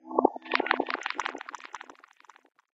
Sound of ice cracking in water manipulated with Iris.
Originally recorded with Aquarian Audio H2a and modified Marantz PMD 661.